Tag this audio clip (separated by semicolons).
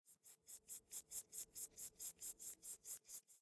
hiss; MTC500-M002s13